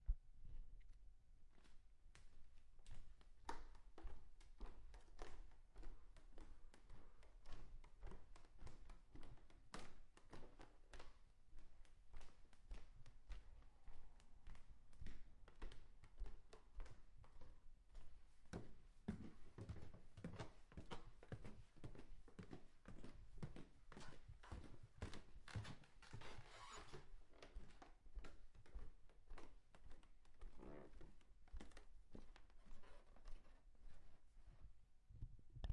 footsteps and stairs wood
Walking around pine wood floors barefoot.